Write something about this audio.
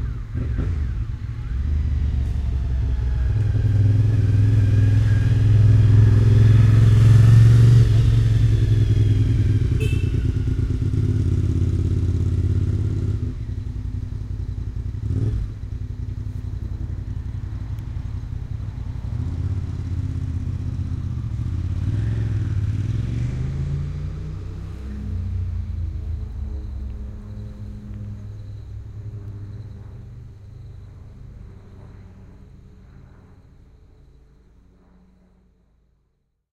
Land and Sky
Motorcycle passes and sounds a short horn before riding around the corner. At the same time a plane passes overhead. Recording chain: Panasonic WM61-A home made binaural microphones - Edirol R09HR digital recorder.